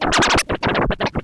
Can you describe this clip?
I thought the mouse "touchpad" of the laptop would be better for scratching using analog x's scratch program and I was correct. I meticulously cut the session into highly loopable and mostly unprocessed sections suitable for spreading across the keyboard in a sampler. Some have some delay effects and all were edited in cooledit 96.